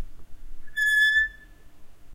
Series of squeaky doors. Some in a big room, some in a smaller room. Some are a bit hissy, sorry.